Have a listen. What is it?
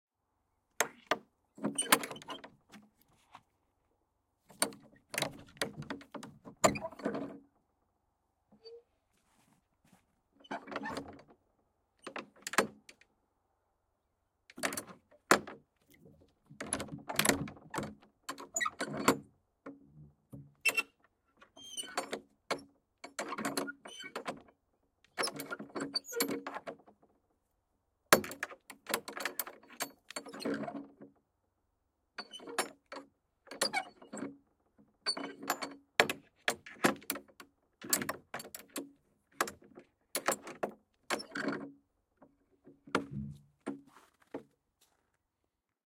Barn Door Lock and Latch Fiddling
Opening and closing a hinging latch and removing associated padlock on a barn door
latch, lock